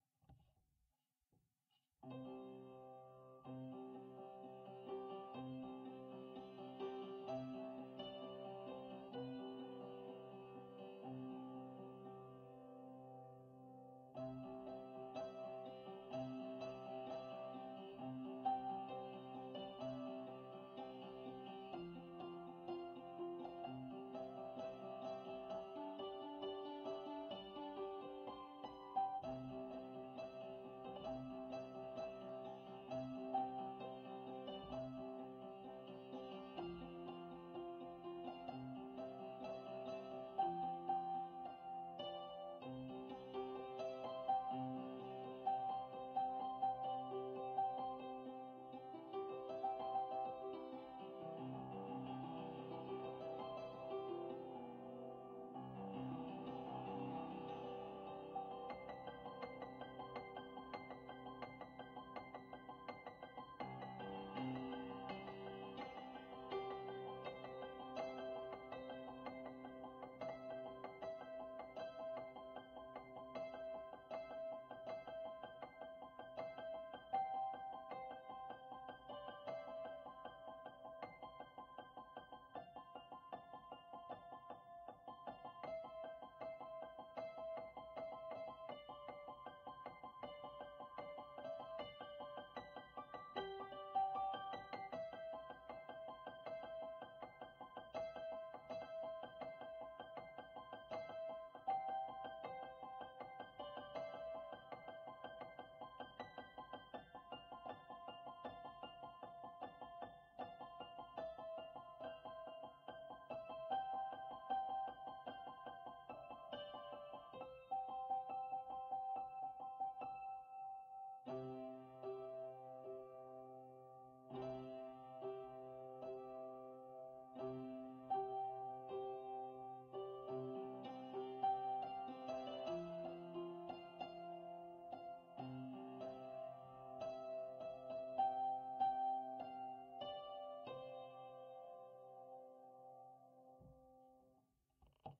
JingleBells Piano
A piano playing jingle bells improvisationally
bells, jingle, piano, playing